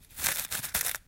Some paper clips moved by a finger.